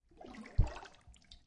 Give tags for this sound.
Splash Water Water-sloshing

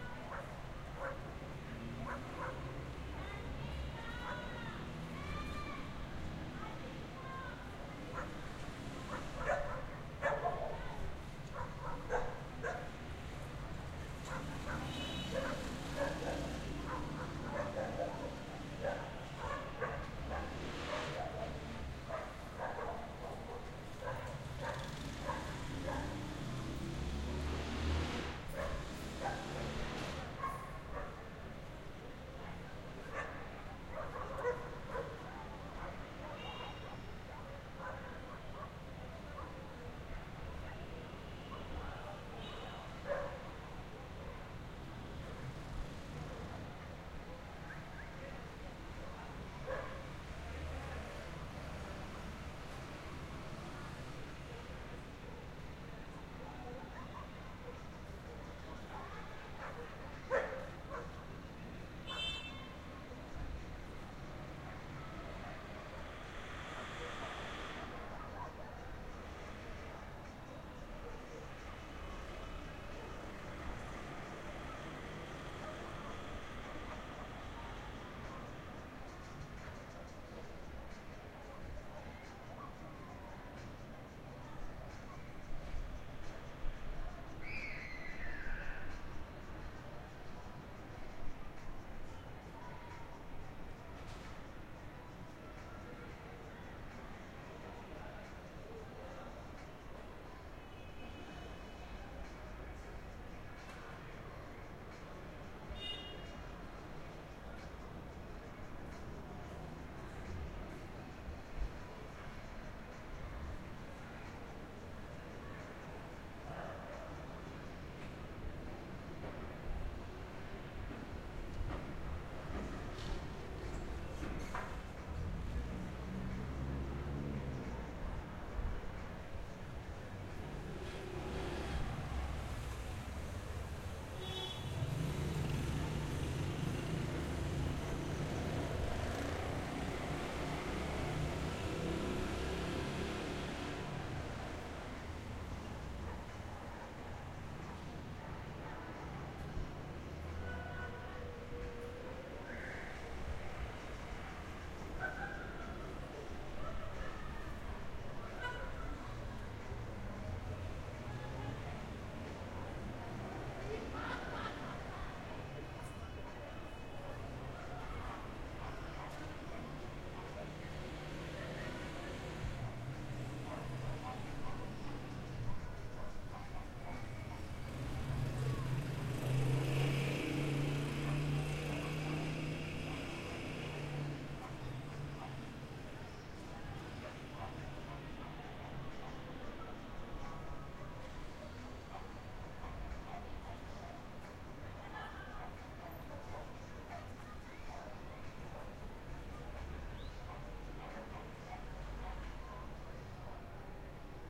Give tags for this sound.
ambience background

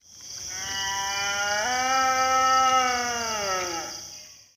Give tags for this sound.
baskerville-hound cry frightening growling horror howl monster night red-deer roar scary werewolf wolf

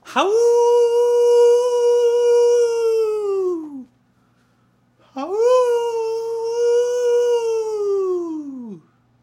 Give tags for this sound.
coyote howl

